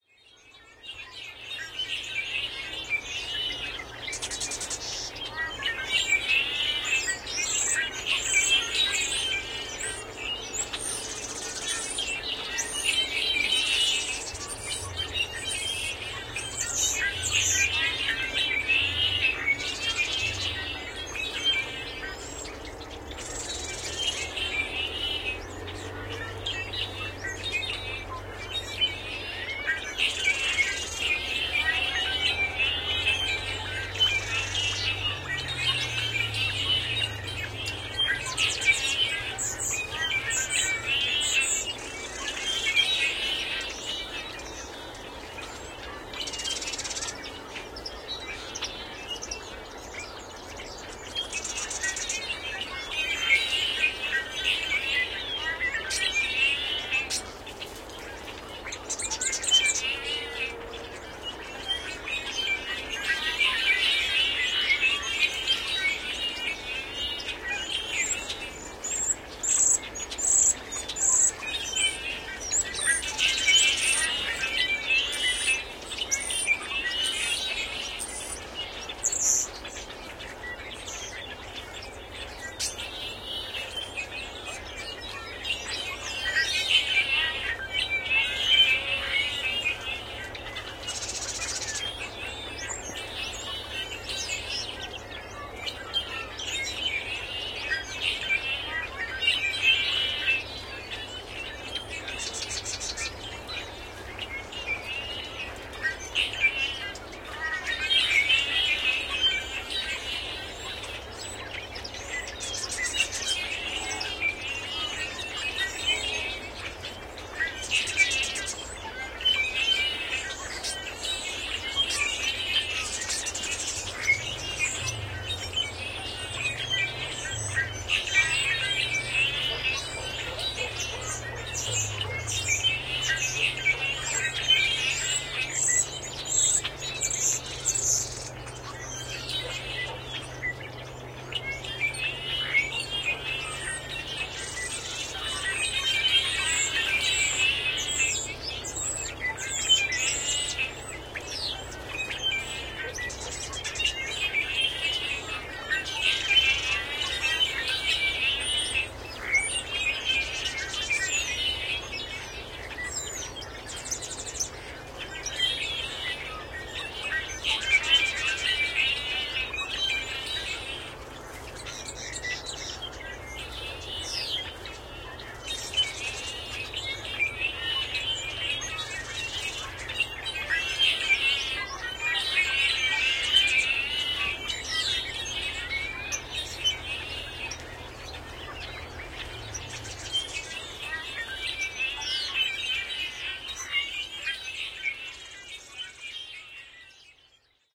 TB1 track08
This recording was done February 27th, 2009, on Sherman Island, California.
california, blackbirds, sherman-island